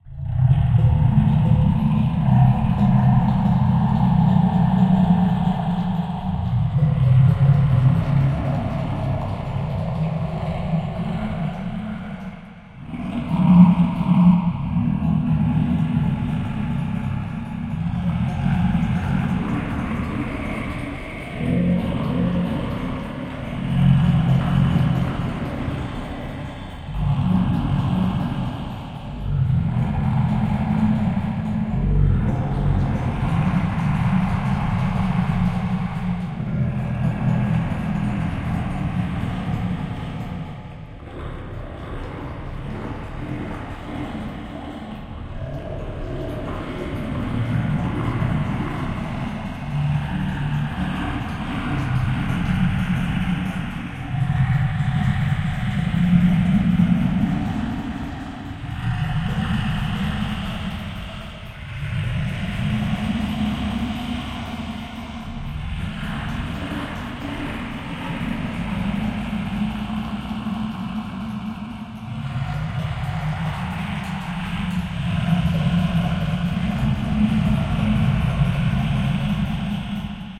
04 - reversed, IR-1
3rd step of sound design in Ableton. reversed the previous sample and added reverb with Wave's IR-1